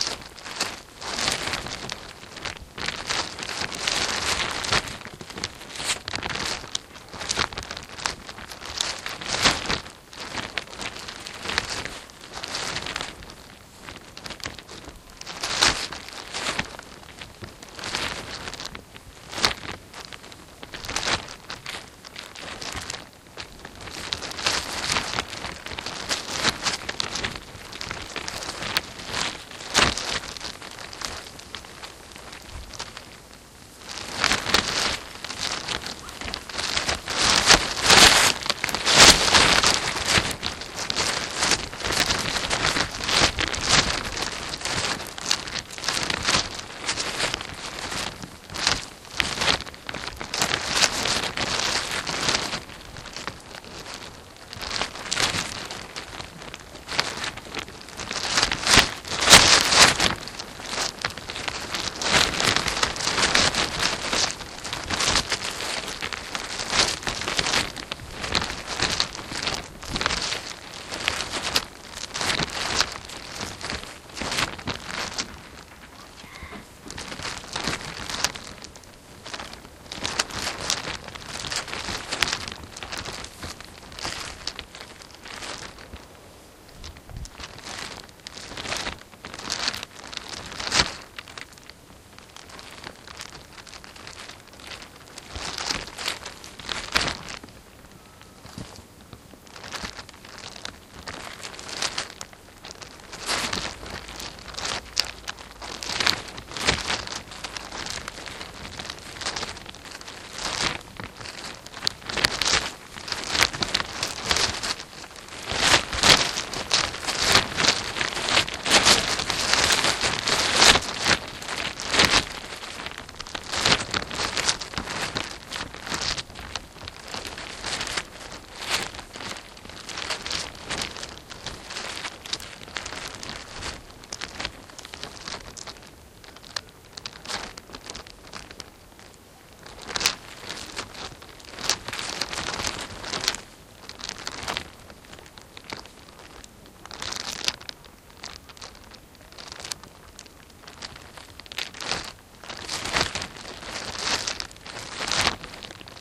CAMPSITE WINDBREAK
5 pole polythene windbreak shifting in the wind on Shortlake campsite, Dorset.
campsite, rustling, wind, windbreak